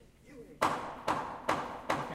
industrial hammer wood distance3
hammer banging a nail 10m away
wood, distance, house, frames, hammer